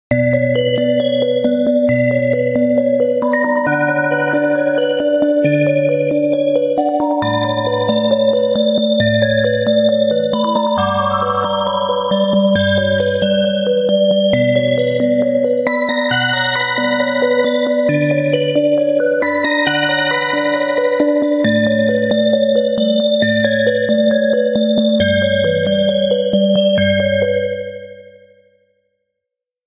short composition 01
box, clock, dark, horror, music